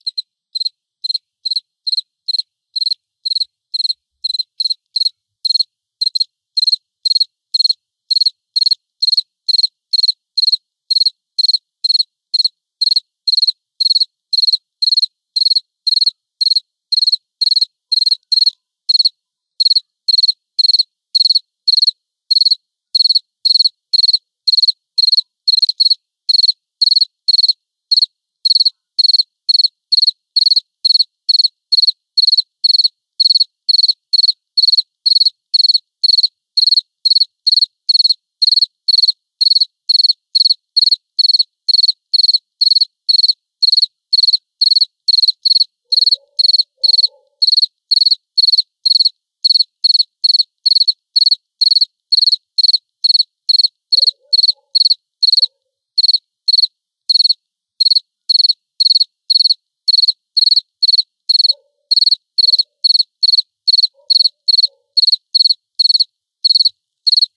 Very clean sound of cricket "singing"/"chirping" without ambience, recorded very close with Samsung S7 Edge
insects, samsung, evening, ambience, crickets, bug, insect, field-recording, cricket, night, chirp, nature, summer, eve, bugs